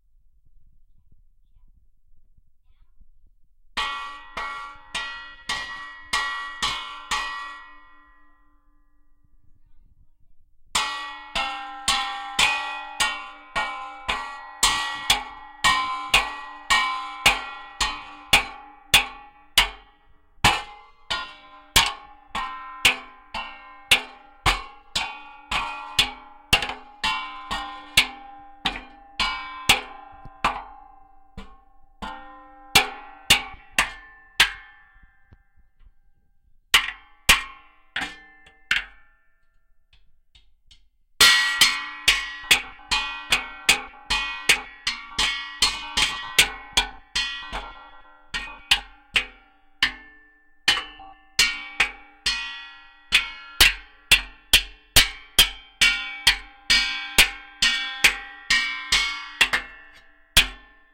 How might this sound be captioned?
Contact mic attached to an empty keg, and my son banging it with a stick